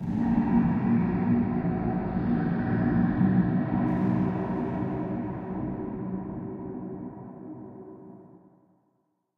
Tripod scary monster growl
Another scary shout I created today